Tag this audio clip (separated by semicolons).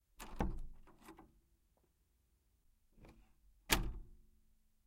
closing; wood; close; wooden; door; doors; open